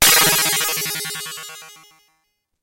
Sound made with the Arturia Minibrute.
analog, synth, synthetic, synthesizer, minibrute